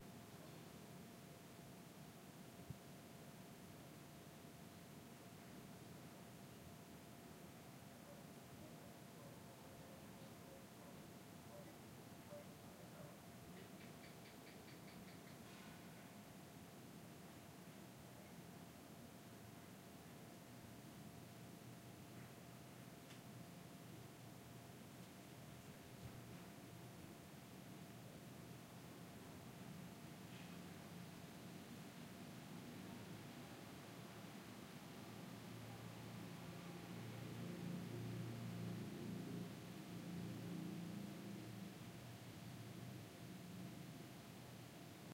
Roomtone - Bedroom
I recorded the "silence" in a small bedroom. You can hear the faint sound of a lizard/gecko, and a motorcycle pass by. Recorded with a Zoom H4.